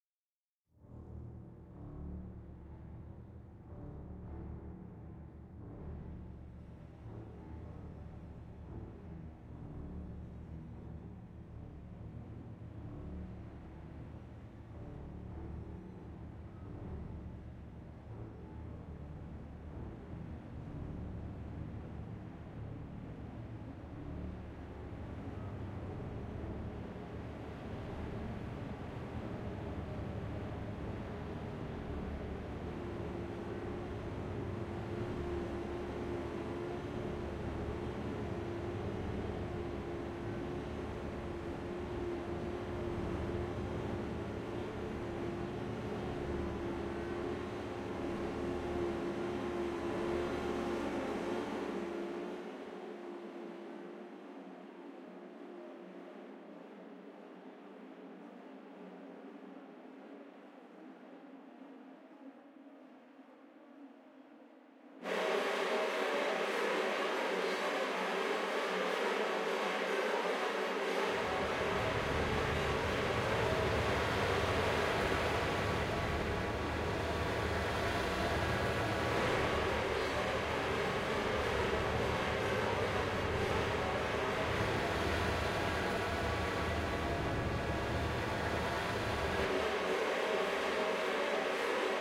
Orchestral Rising Horror #1
Another track in the drafts, inspired by the works of James Wan (Insidious and The Conjuring) this suspenseful track is the beginning of a new chapter and theme.
Hope you enjoy!
Open for commissions and edits, As always feedback is appreciated!
Atmosphere, Creepy, Dark, Demon, Demonic, Drone, Evil, Film, Ghost, Halloween, Horror, Insidious, Jump-scare, Movie, Music, Orchestra, Orchestral, Scary, Score, Sound, Spooky, Thriller, Video-Game, Violin